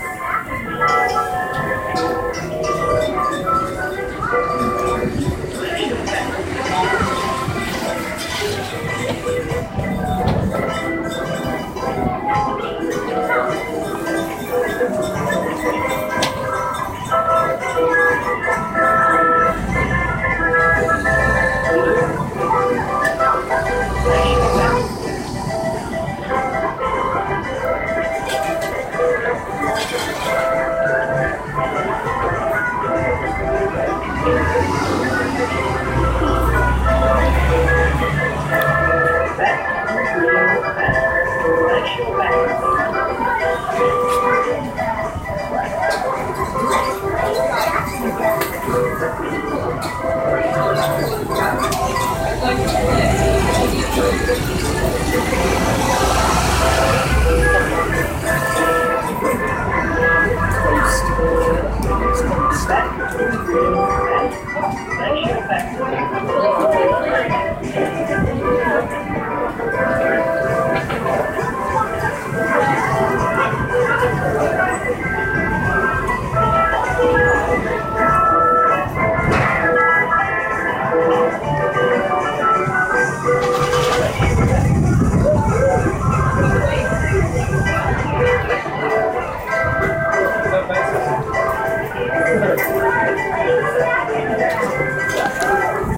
Fairground Organ
Music played in an amusement arcade. Player thru loud speakers.